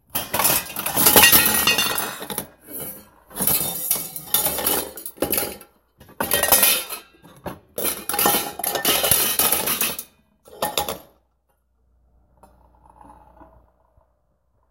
Pots and Pans Crashing
Recorded by spilling pots and lids out of my kitchen cabinet onto the tile floor.
crashing, pans, crash